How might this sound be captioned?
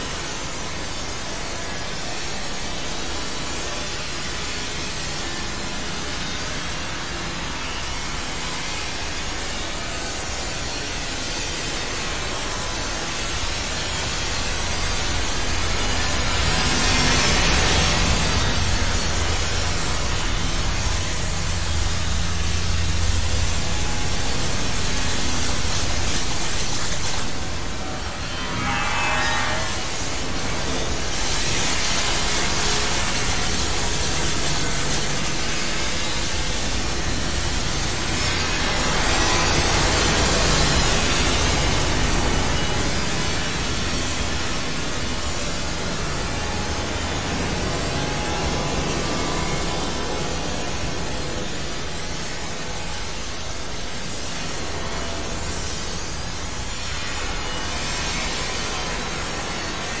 Walking Thru
Door, Walking, Waiting